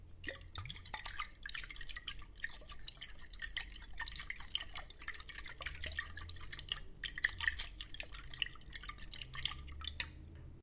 Water + glass bottle, sloshing 2
Water sloshing inside a glass bottle.
foley, fx, Water, sloshing, effect, glass, bottle, slosh